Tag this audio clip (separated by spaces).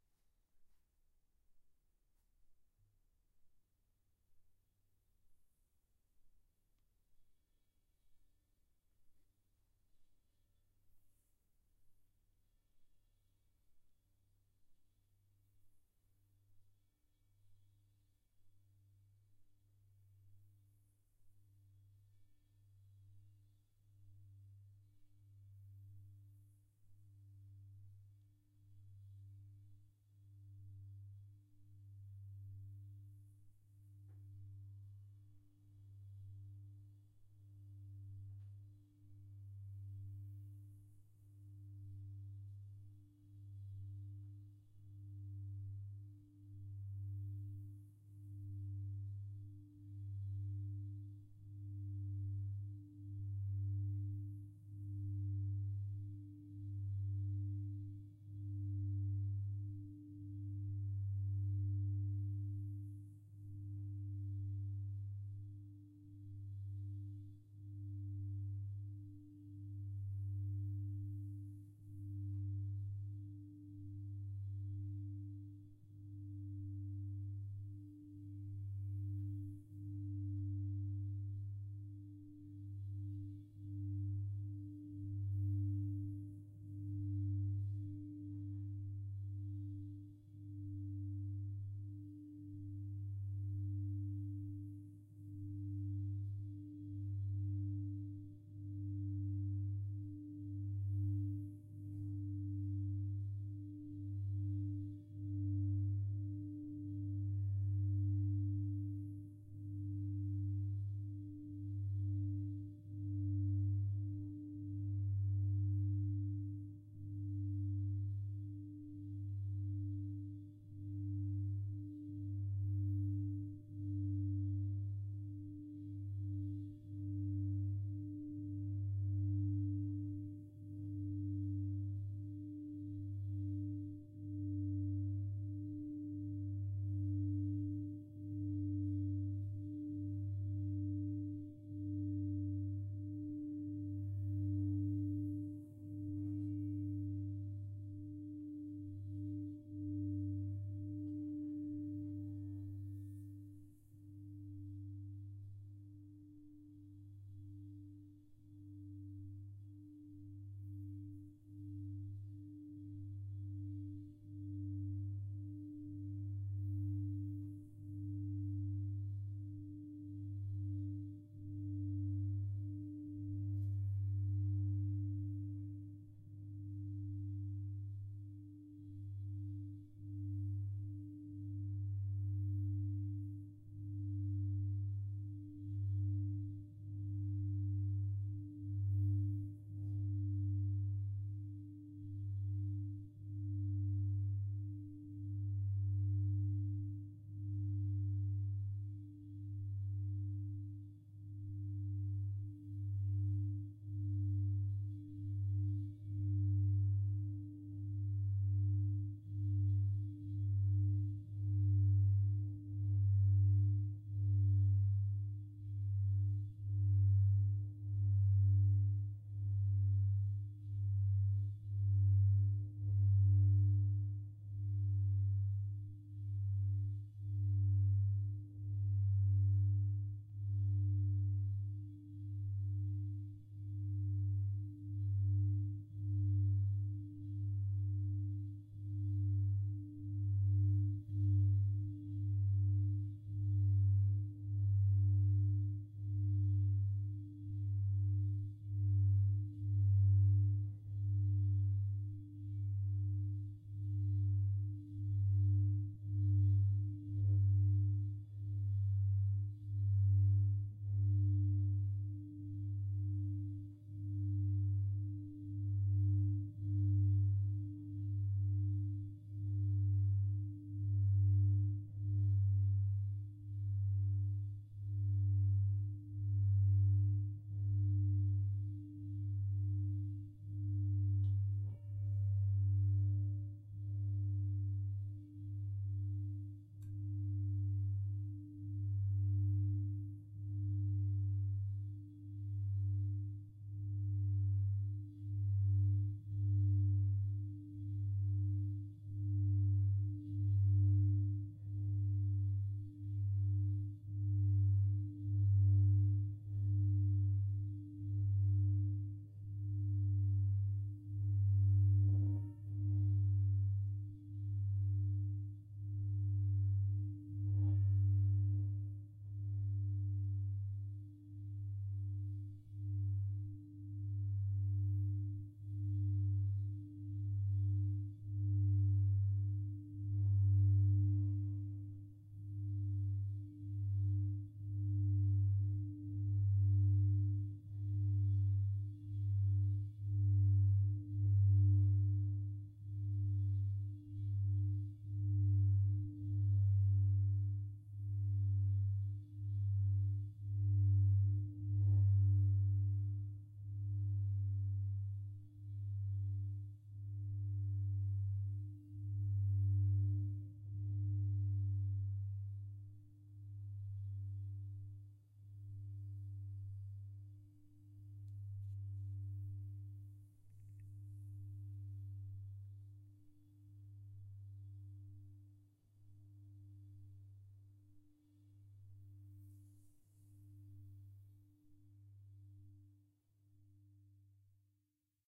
singing-bowl percussion scale relaxation pentatonic meditation antique relaxing meditative himalayan brass